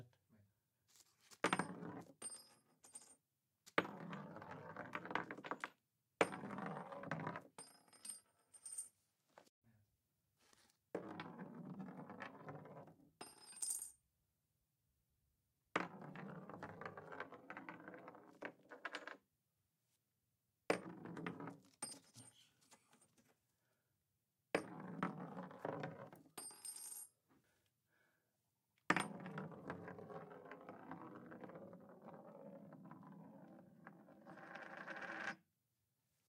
coin roll on wood CsG
coin, roll, wood